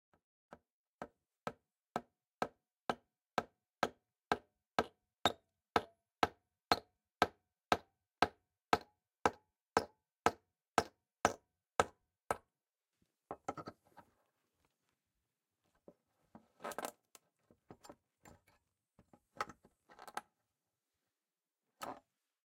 Recoreded with Zoom H6 XY Mic. Edited in Pro Tools.
Hammering in a nail, then pulling it out.
thump, nail, hammer